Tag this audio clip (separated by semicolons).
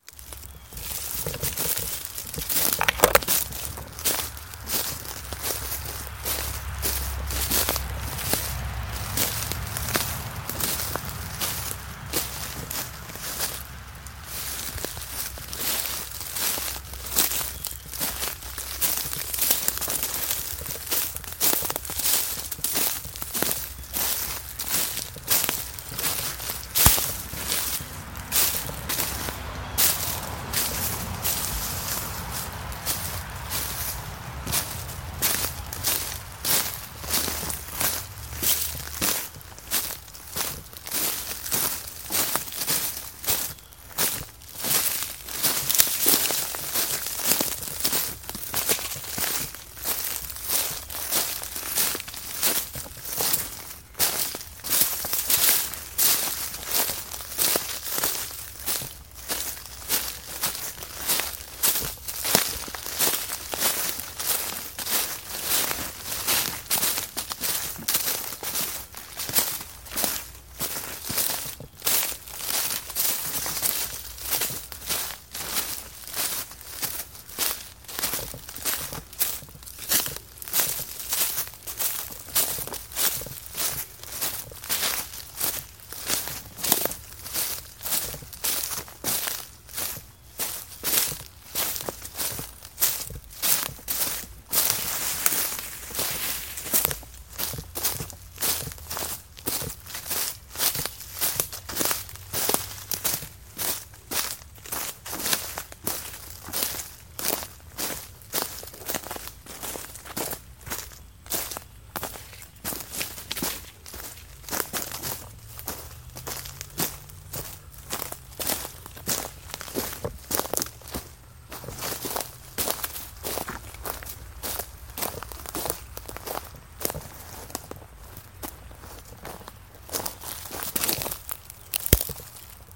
foley; walk; outside